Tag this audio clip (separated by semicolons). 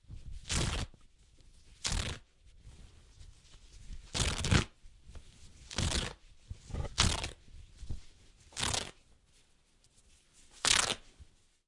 cloth; tearing